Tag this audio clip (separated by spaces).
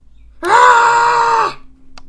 agony screaming screams scream horror ahh pain